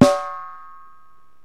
Hi snare 1
My recording of my snare hit with a higher tone.
recording, drums, live, snap